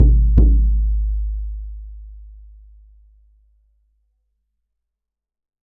NATIVE DRUM DOUBLE STRIKE 01
This sample pack contains 9 short samples of a native north American hand drum of the kind used in a pow-wow gathering. There are four double strikes and five quadruple strikes. Source was captured with a Josephson C617 through NPNG preamp and Frontier Design Group converters into Pro Tools. Final edit in Cool Edit Pro.